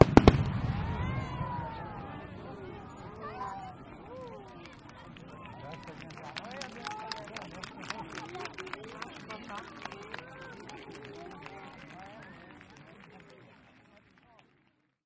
fireworks impact15
Various explosion sounds recorded during a bastille day pyrotechnic show in Britanny. Blasts, sparkles and crowd reactions. Recorded with an h2n in M/S stereo mode.
blasts, bombs, crowd, display-pyrotechnics, explosions, explosives, field-recording, fireworks, pyrotechnics, show